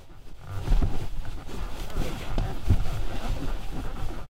Wiggling in seat MOVEPress
Harsh rappid movement on a seat.
movement, noise, seat